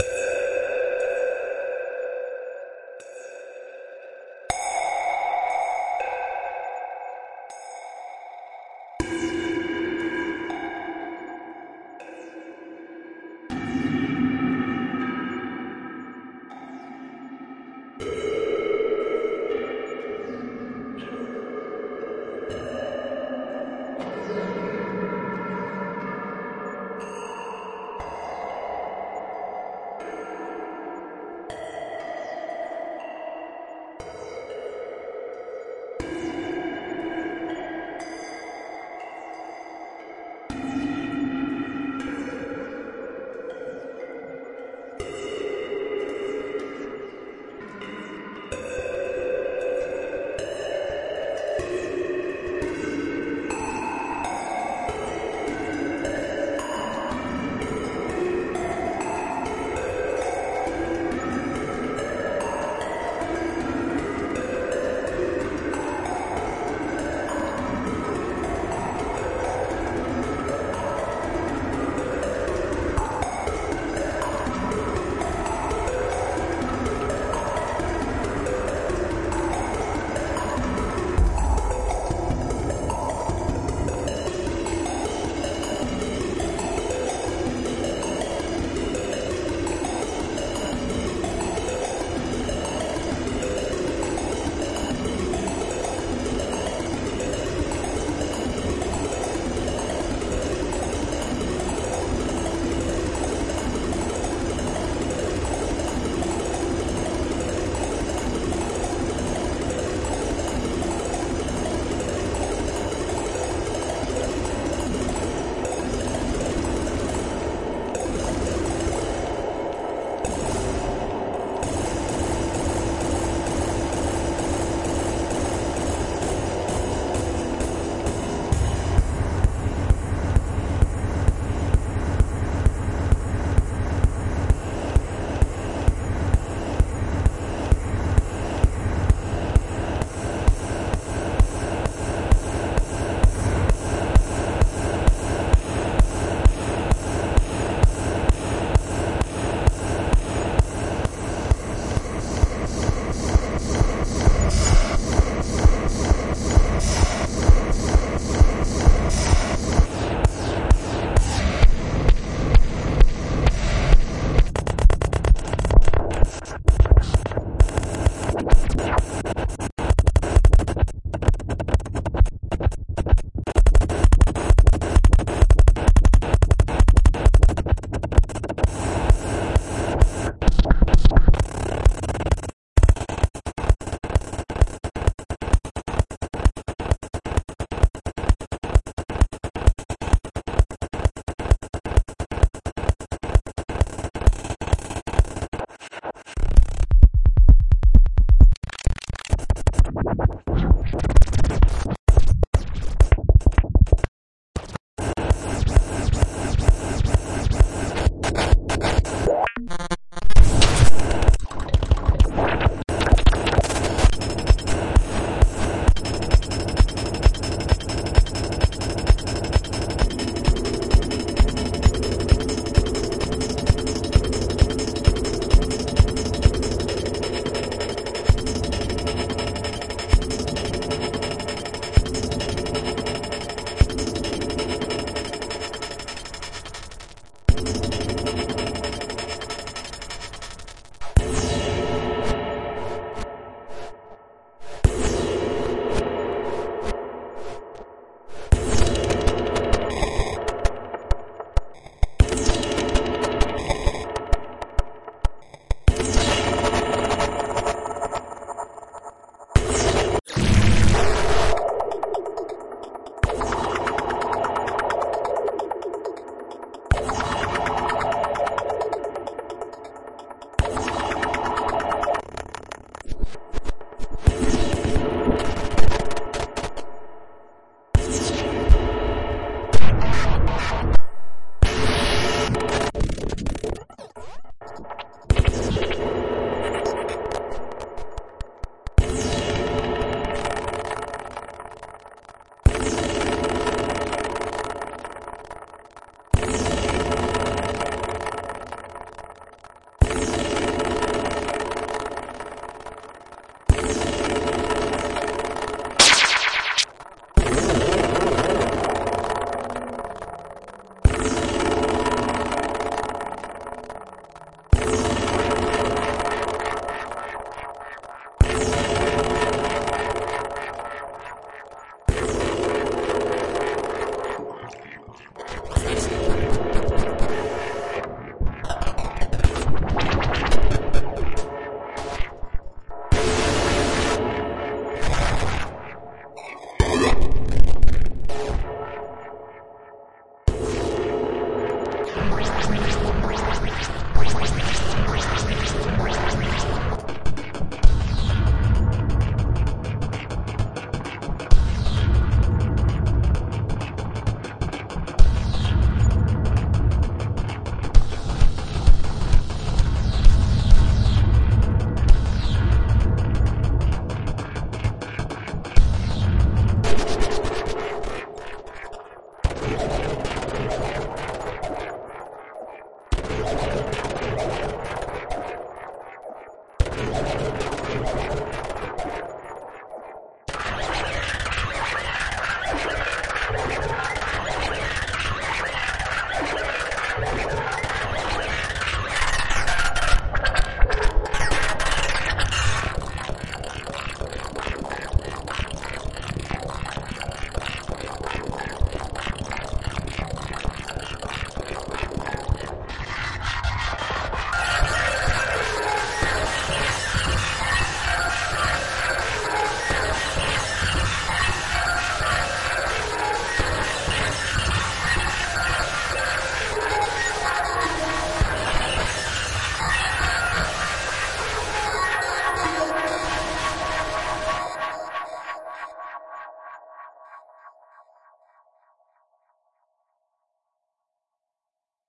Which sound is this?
Coronavirus has really screwed up everything even with my work so I will be having more free time to do some more sound design stuffs.
Be safe everyone.
ambiance, ambient, delay, drums, effect, electronic, electronica, envelope, filter, fl, modulation, music, one, pad, piano, reverb, roll, shapes, sharper, shot, studio, synth, vst